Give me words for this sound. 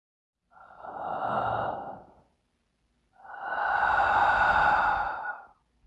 Breath in and out with open mouth
breath, hoo, human, phoneme, vocal